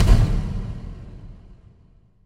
Acoustic kick drum with reverb effects processed with cool edit 96.
acoustic, kick, drum, free, percussion, sample, bass